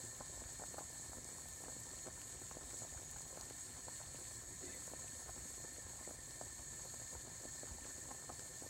Sound of pasta cooking